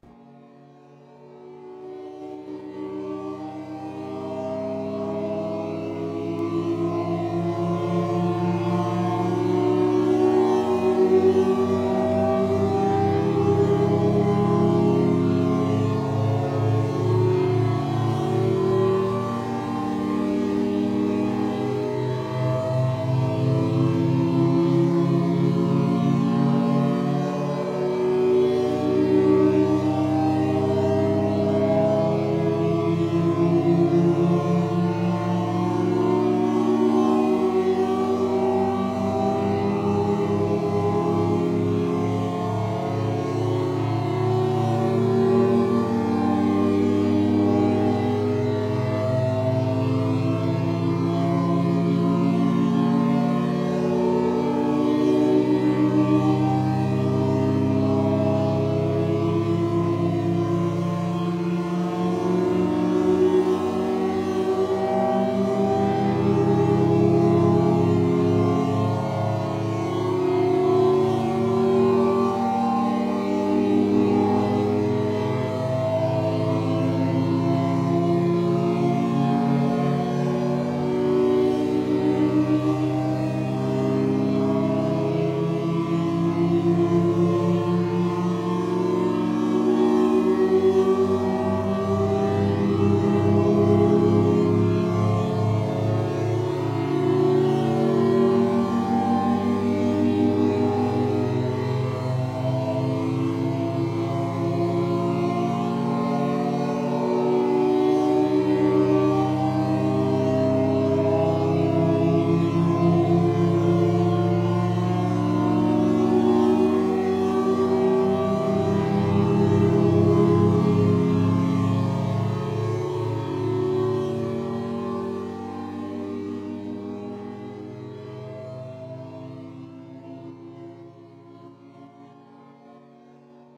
I used DAW Renoise to edit basic shepard tone and made this real. I hope You like it
analog, bass, distortion, electronic, hallucinogenic, infinite, oscillator, pitch, renoise, shepard, synth, ton, tone, up, wave